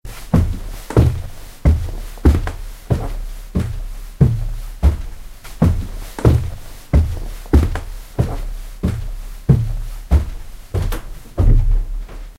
The sound of someone walking up a carpeted staircase in slippers